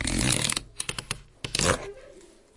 Queneau carton plus moins rapide 04
grattement sur un carton alveolé
cardboard, paper, pencil, scrape, scratch, scribble